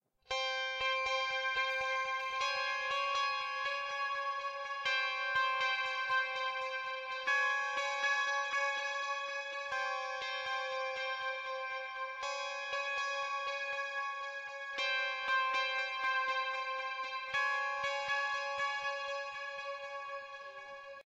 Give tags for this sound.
gear
moody
processed
software

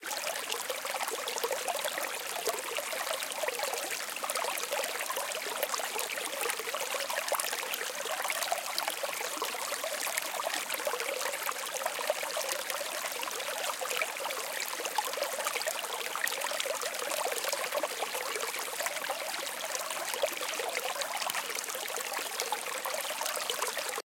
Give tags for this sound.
stream
streamlet

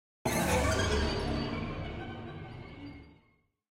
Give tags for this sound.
atmosphere
dark
film
fx
games
horror

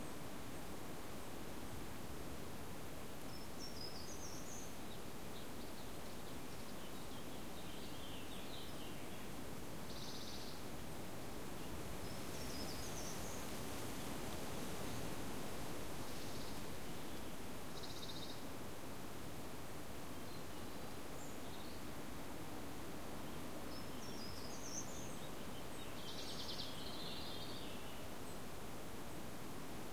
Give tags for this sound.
ambiance
ambiant
ambience
atmosphere
background
birds
field-recording
forest
soundscape
wind

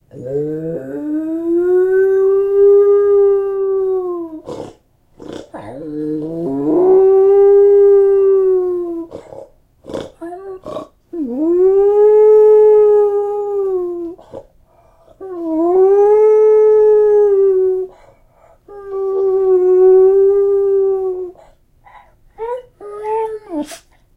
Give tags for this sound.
animal
beagle
dog
howl
noises

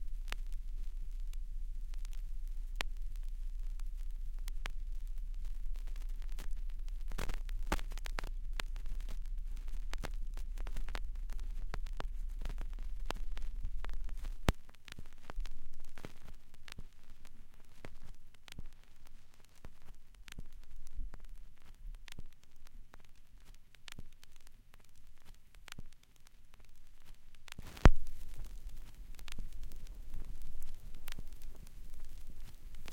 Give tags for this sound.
crackle
dust
noise
record
static
turntable
vinyl
warm

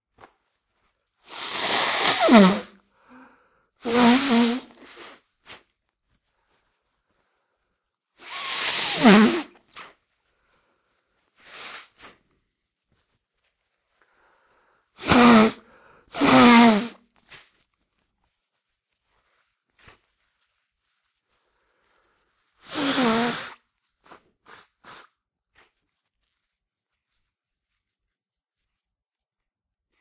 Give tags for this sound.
ill
angina
krankheit
snot
disgusting
krank
cold
sick
sickening
disgust
sickness
yuk

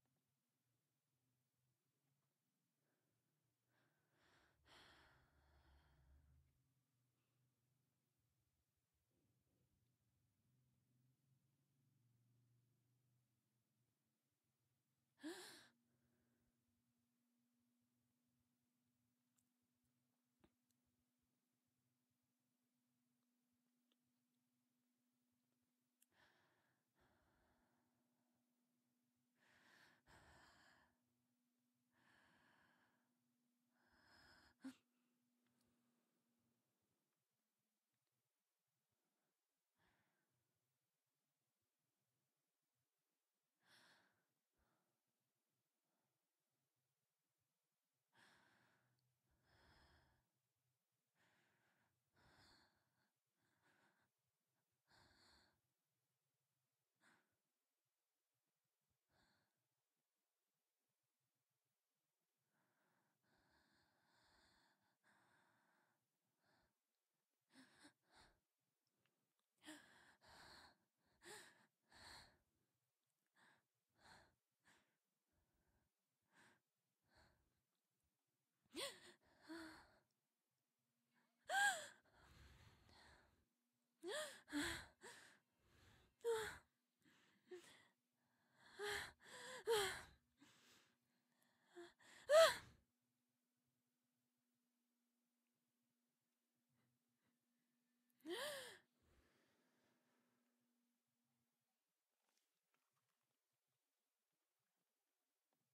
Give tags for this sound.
Gemidos
Suspiros
Sustos

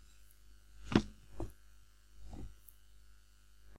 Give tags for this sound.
close
desk
drawer
office

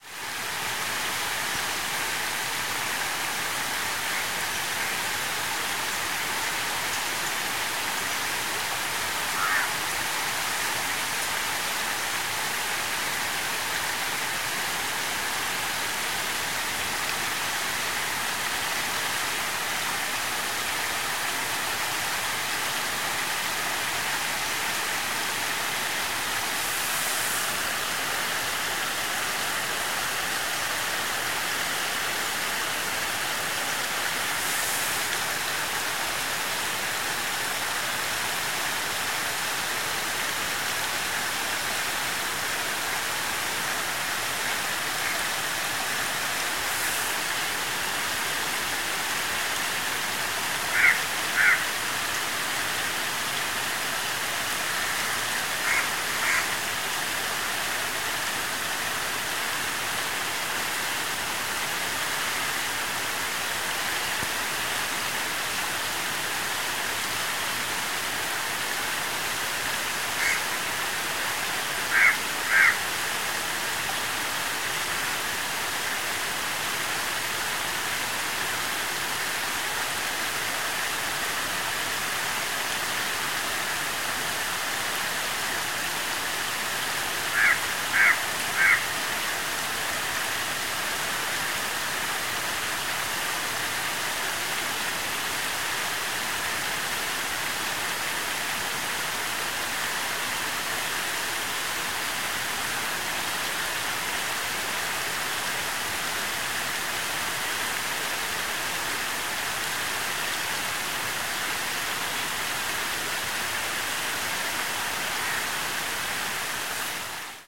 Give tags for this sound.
creek
birds
india
flow
kerala
crows
chalakudy
relaxing
brook
flowing
stream
nature
river
water
ambient
forest
field-recording

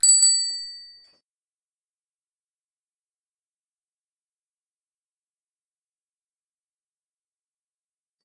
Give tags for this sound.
cycle
ring
bell
bike
bicycle
mechanic

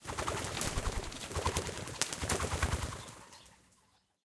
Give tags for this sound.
bird
flap
flapping
fly
pigeon
wing
wings

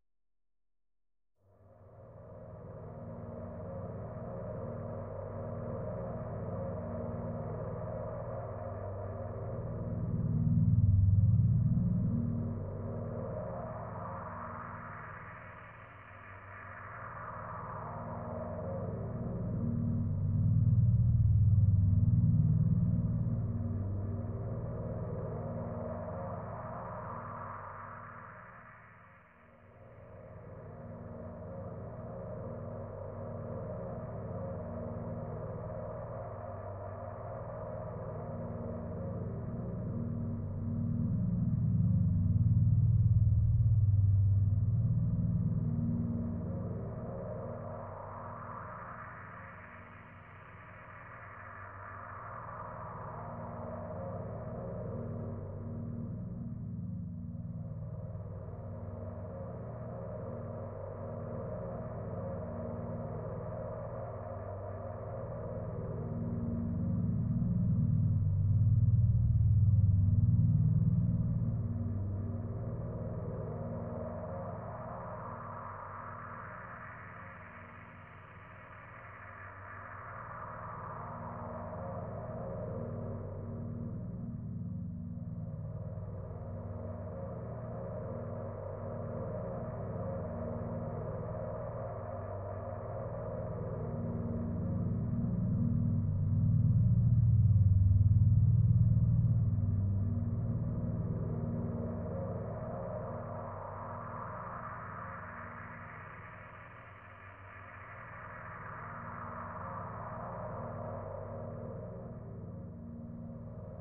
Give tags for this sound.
wavy
lfo
pad
metallic
birth
weaving

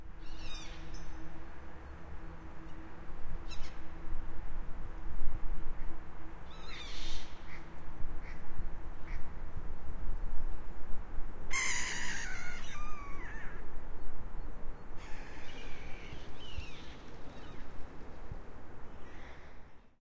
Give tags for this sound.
angry
atmosphere
citypark
distant
duck
feeding
scream
seagull
squeeck
traffic
water

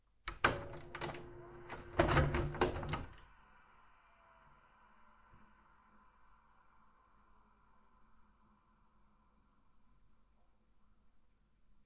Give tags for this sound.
cassette
loop
pack
recording
retro
tape
vcr
vhs

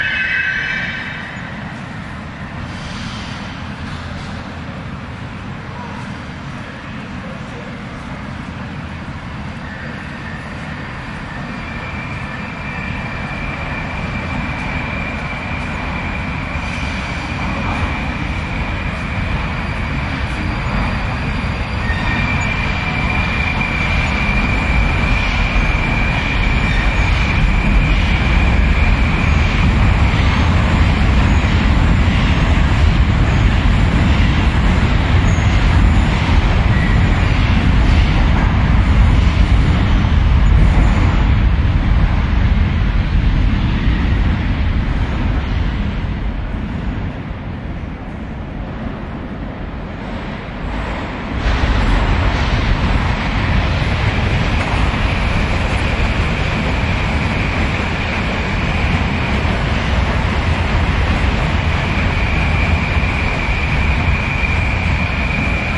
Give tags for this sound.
ambience
ambient
city
field-recording
metro
noise
rail
railway
sfx
soundeffect
station
stereo
subway
train
trains
transport
transportation
underground
urban

